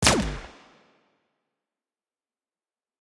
Silenced Gun:2
A silenced pistol shot made of alot of different sounds! ENJOY!!